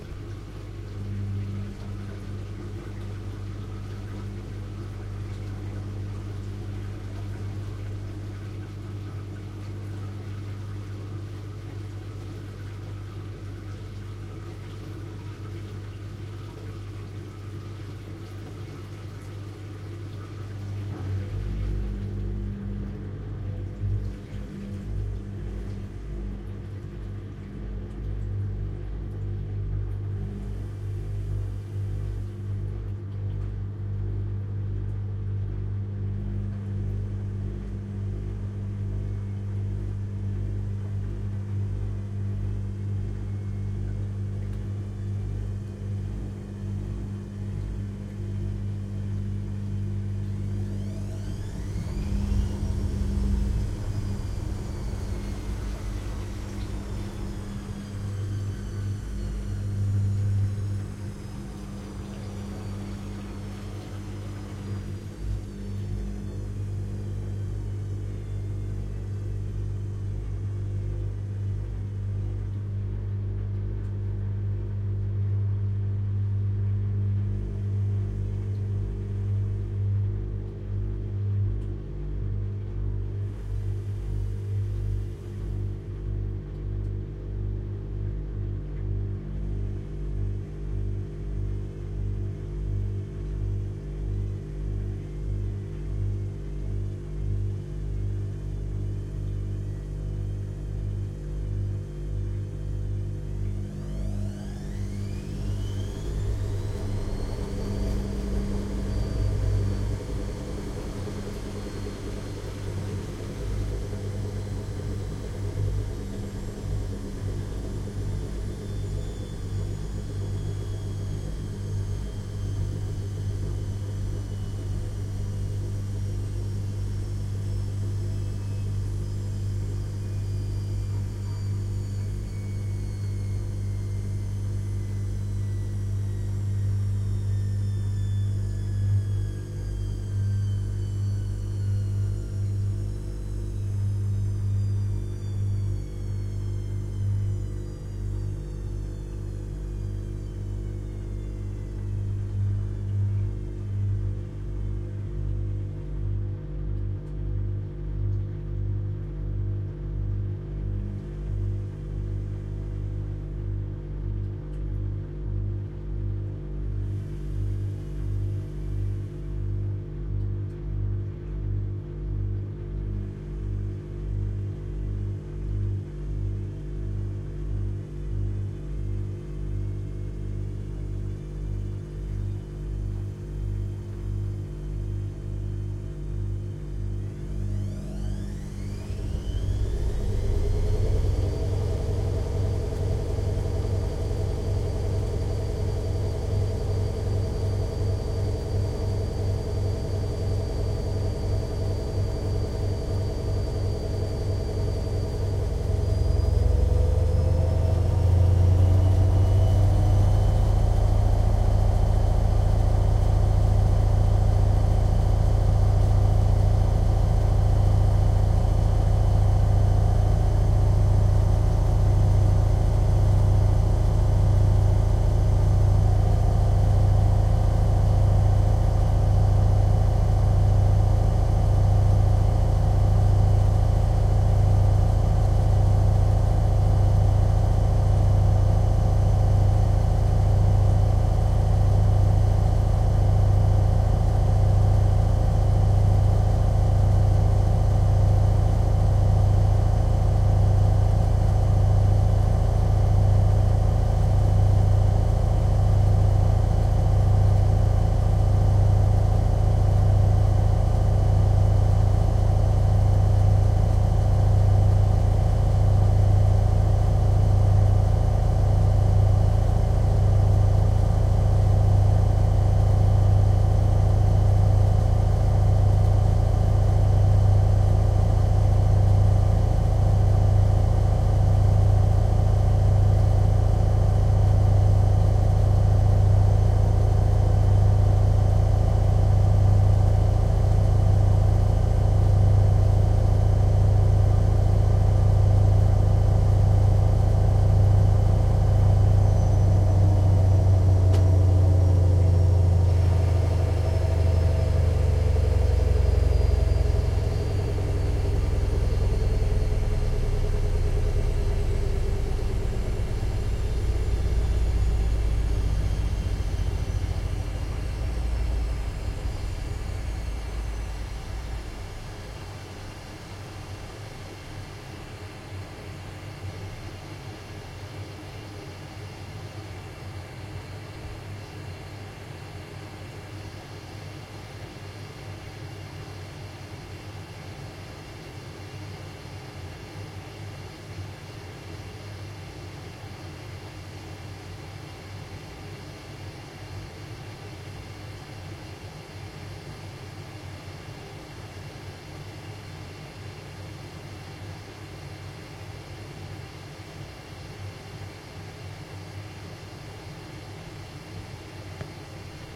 The laundrymachine
Microfone on top of a working laundry machine. No start or stop sounds.
laundry, Laundry-machine, machine, water